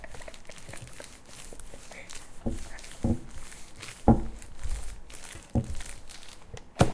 plastic bag scratching
sound, field-recording, city-rings